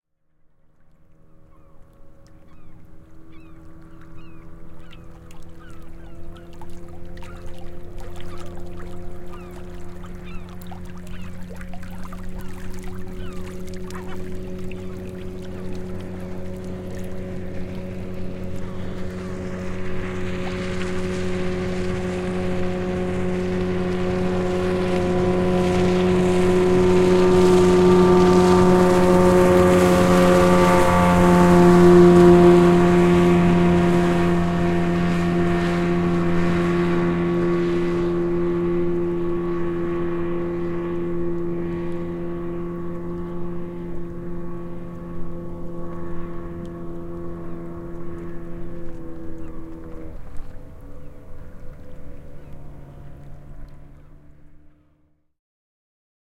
Moottorivene, kumivene, ohi / Rubber boat, motorboat, approaching, passing by, receding, small waves lapping, distant seagulls, Yamaha 20 h.p. outboard motor
Vene lähestyy kaukaa, ohiajo läheltä rantaa, etääntyy. Pienet aallot liplattavat, kaukaisia lokkeja. Yamaha, 20 hv perämoottori.
Paikka/Place: Suomi / Finland / Pori
Aika/Date: 30.07.1993
Boat, Boats, Dinghy, Field-recording, Finland, Finnish-Broadcasting-Company, Kumivene, Moottorivene, Motorboat, Outboard-engine, Rubber-boat, Soundfx, Suomi, Tehosteet, Vene, Veneet, Veneily, Vesiliikenne, Waterborne-traffic, Yle, Yleisradio